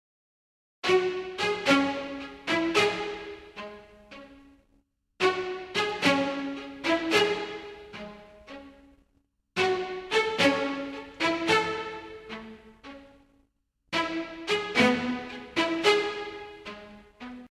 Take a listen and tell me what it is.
Cello E maj 55
loops, lo-fi